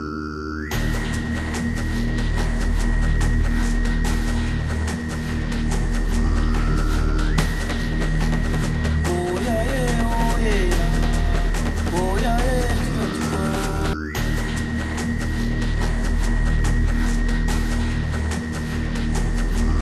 Hello again. This time I have brought you a new sound of Tribal Combat, of the tribes that inhabited South America and Central America, I doubt very much that the Aztecs had a similar system. I hope you find it useful